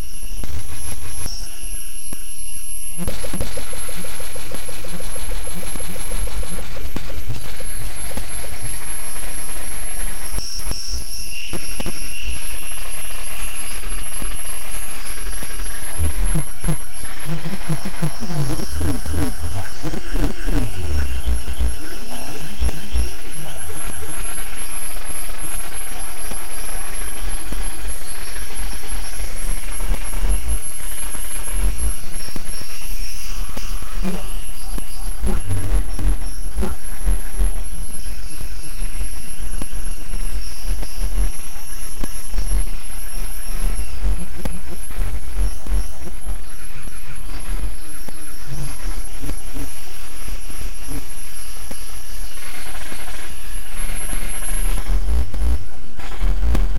pixl swamps04
and even more. These Sounds were made by chaining a large number of plugins into a feedback loop between Brams laptop and mine. The sounds you hear
are produced entirely by the plugins inside the loop with no original sound sources involved.
electronic, sci-fi